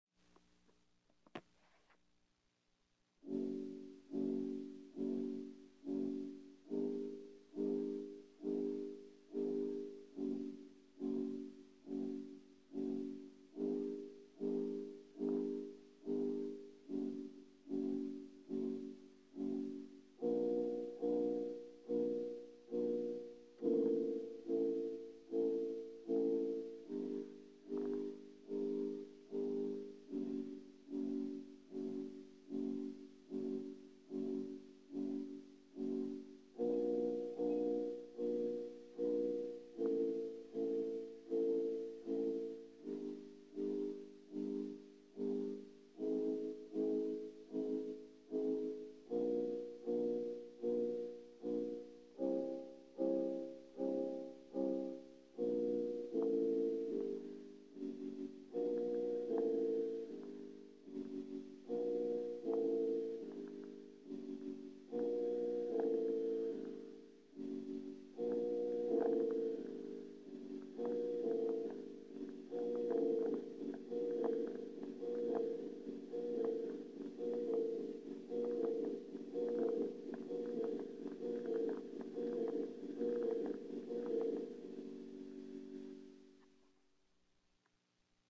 shortness of breath
An ideal suspense song for a horror video that intrigues fear or persecution.
Una cancion de suspenso ideal para un video de terror intriga miedo o persecucio
breath, fear, intrigue, shortness, suspense